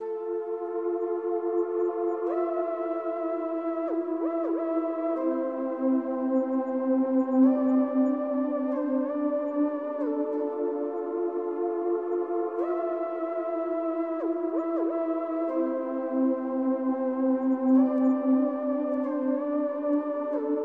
Had sadness
pad, trance